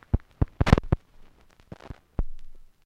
Clicks and pops recorded from a single LP record. I carved into the surface of the record with my keys, and then recorded the sound of the needle hitting the scratches. The resulting rhythms make nice loops (most but not all are in 4/4).
glitch, noise